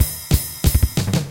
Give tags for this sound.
drum
loop
91
bpm

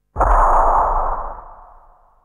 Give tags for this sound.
base
industrial

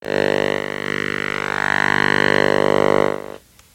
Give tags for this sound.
radio
interference
noise
buzz
hum
shortwave
band
vlf
static

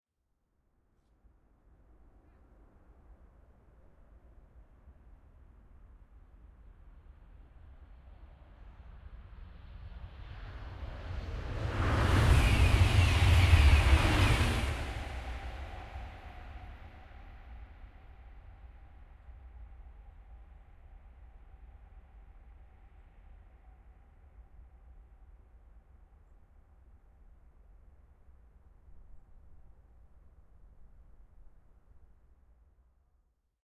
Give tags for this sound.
doppeler-effect
fast-train
high-speed-train
thalys
train

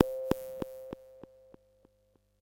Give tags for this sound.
100bpm
electronic
multi-sample
synth
waldorf

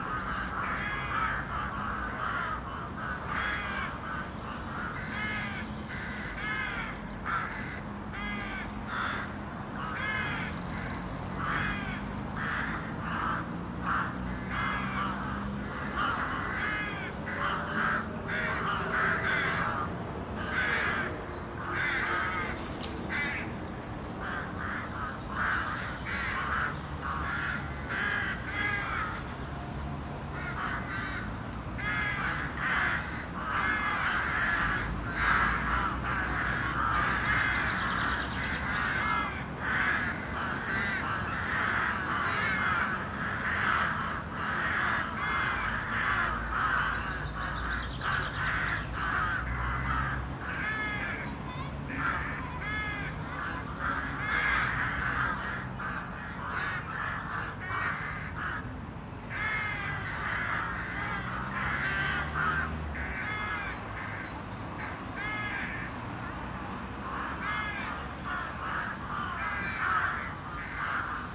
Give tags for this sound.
bird
city
crow
crows
field-recording
nests
ravens

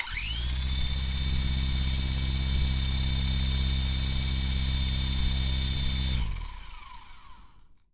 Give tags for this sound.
Spinning
Fast
Transportation
Helecopter
Ride
Transport
Remote
Copter
Engine

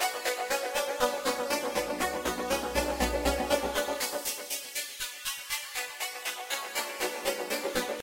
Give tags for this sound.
synth
pad
music
120bpm
sequence
rhythmic
loop

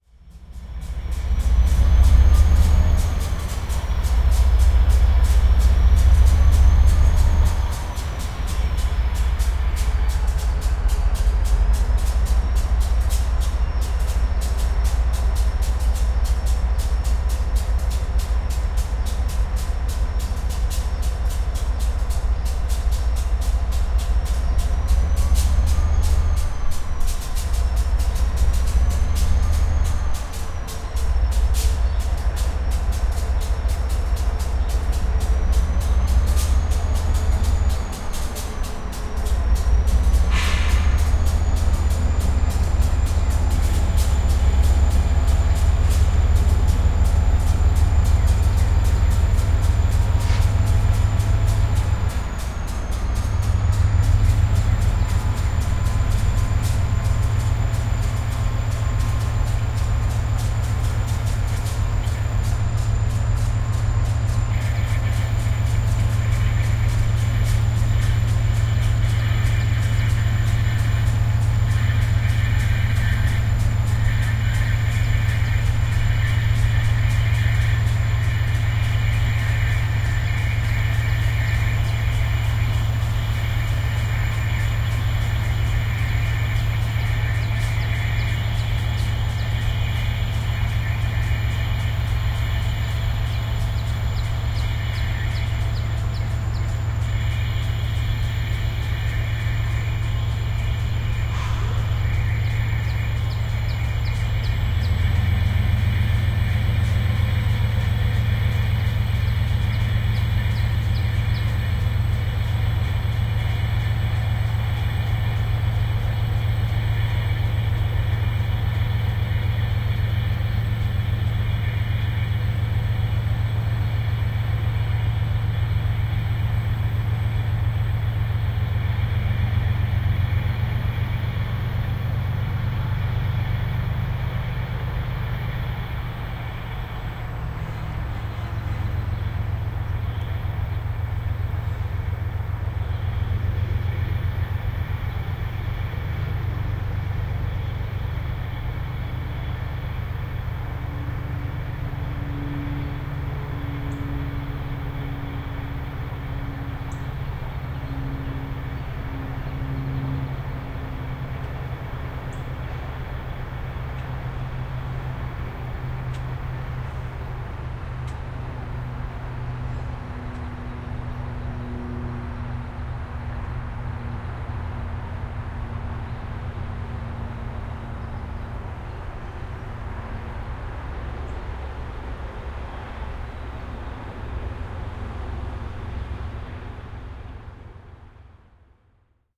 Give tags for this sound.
engine
field-recording
locomotive
scraping
train

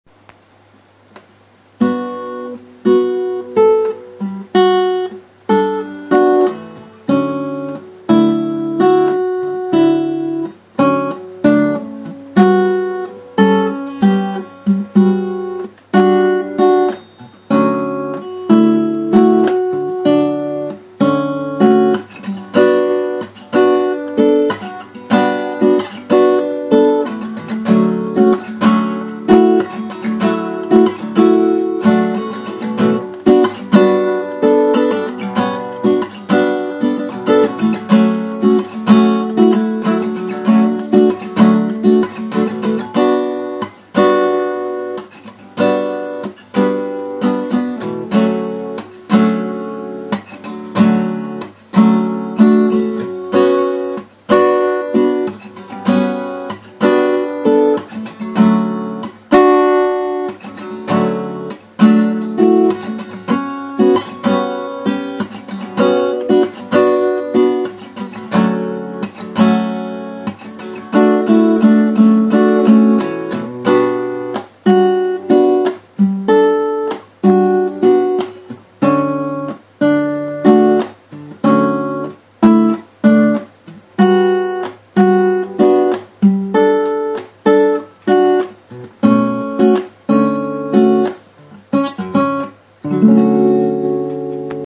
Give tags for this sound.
Guitar
Instrumental
strings

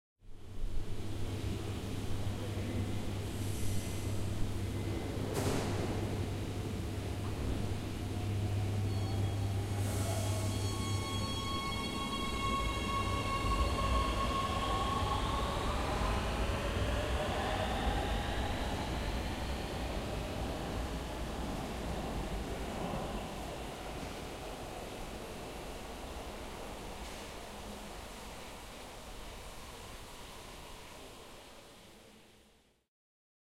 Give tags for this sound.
subway
metro
train

